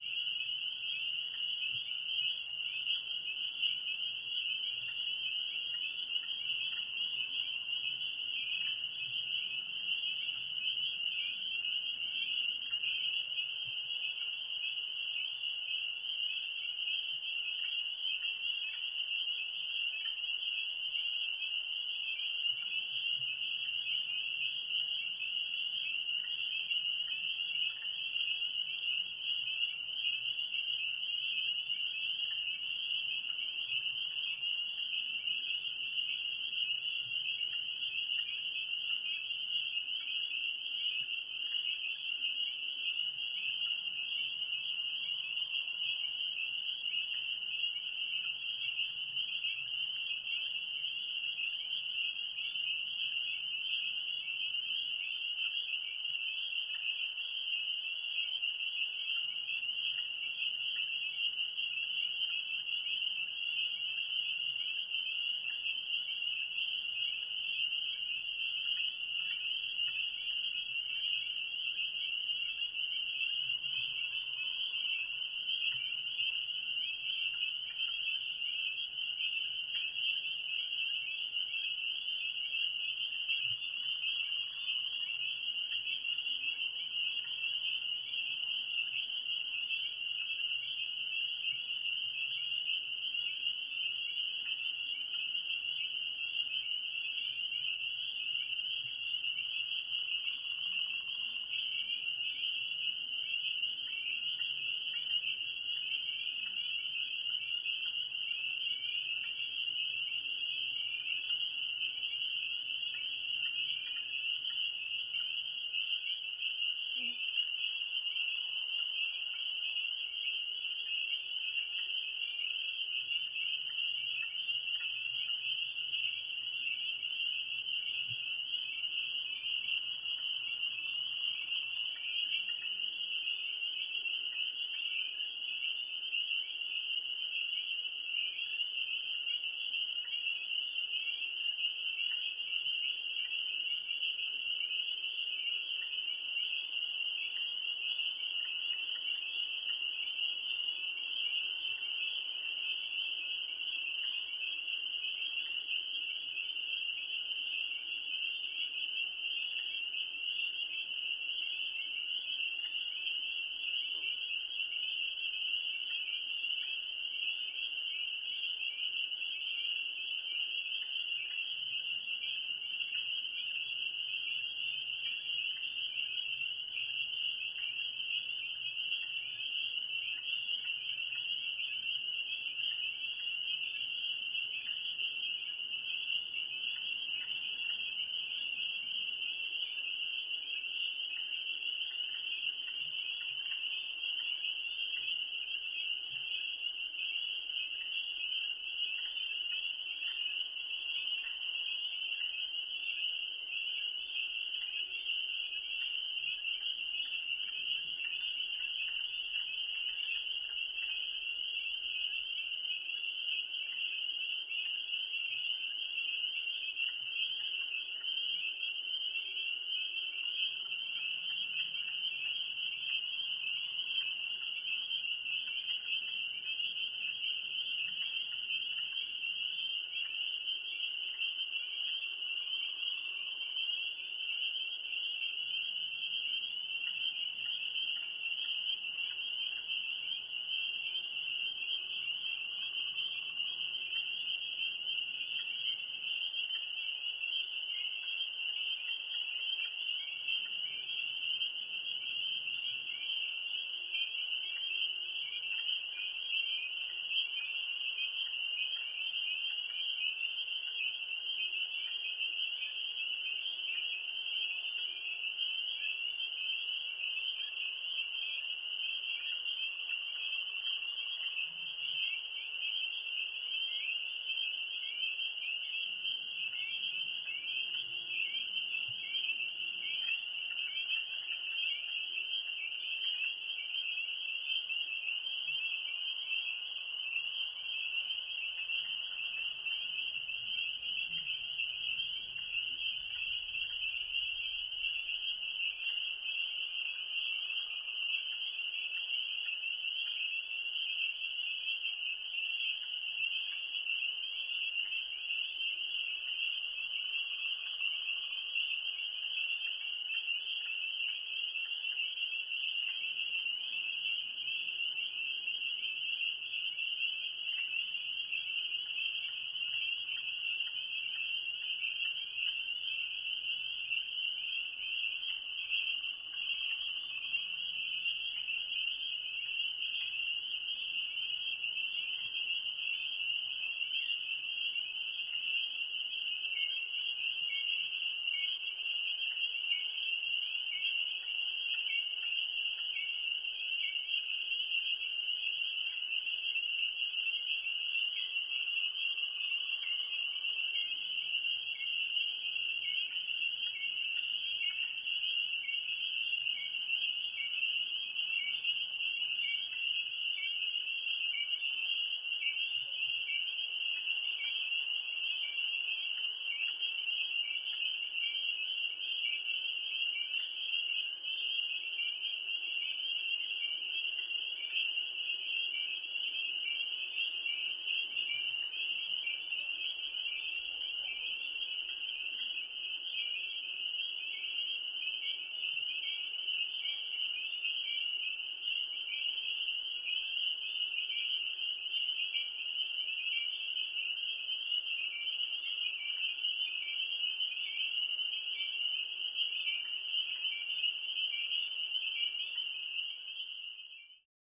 Peepers and other frogs. ACtive spring wetland at dusk. This is a quad recording. XY is front pair and file with same name but MS is back pair. Recorded with an H2 Zoom.